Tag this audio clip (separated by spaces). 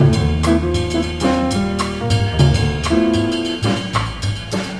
bebop sampled-instruments